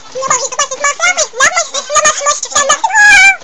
This is a sound a randomly made up and it reminds me of the holy movie of Alvin and the chipmunks. it's fun!